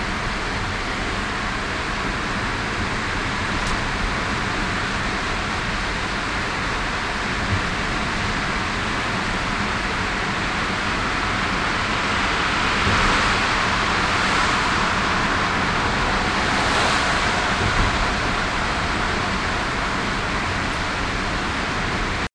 virginia baytunnel
Inside the tunnel, under the Chesapeke Bay heading south recorded with DS-40 and edited in Wavosaur.